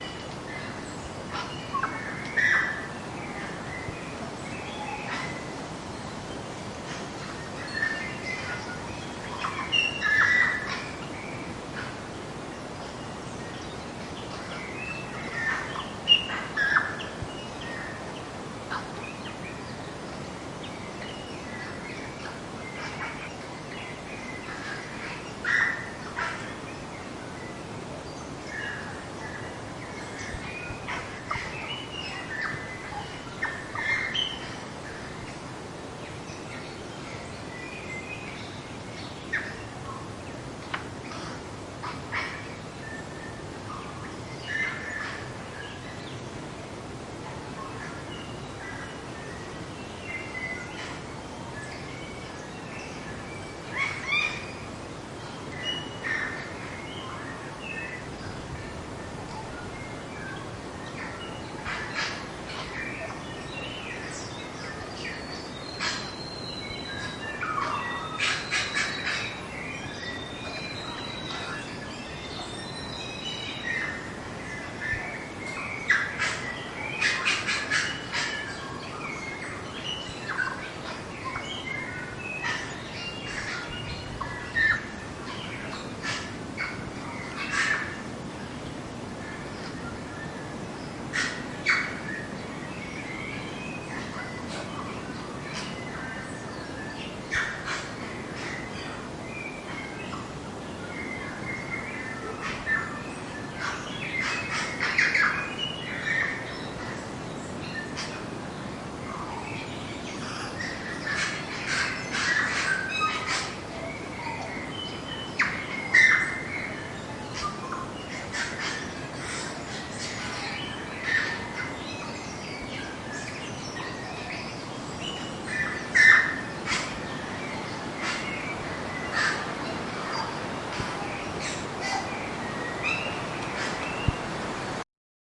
Multiple native New Zealand birds singing in the bush. Mainly Tuis, in different distances and widely spread over the stereo image. Towards the very end a little wind.
Recorded at Goldies Bush, New Zealand, in October on a sunny day.
Recorded with a Zoom H2 recorder and its two internal microphone-pairs.
This is the "front" channel-pair of a four-channel recording.